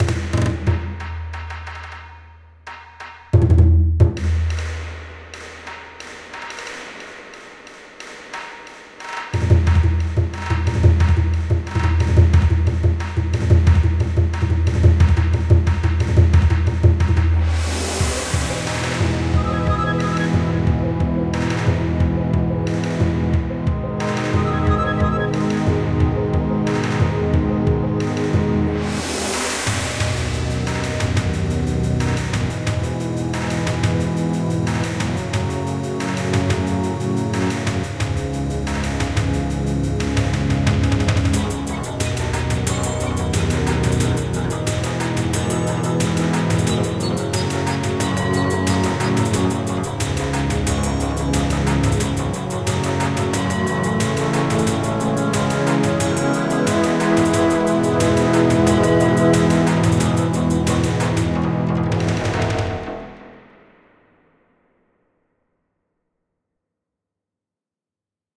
Dẫn Đi Chơi Phần 1
Background music for short animción 'Bay Đến Rạp Phim' version 2022.03.21. Create use Garageband with World Music and Remix Jam Pak.
Bay Đến Rạp Phim - 2022.03.21:
action, cartoon, movie, music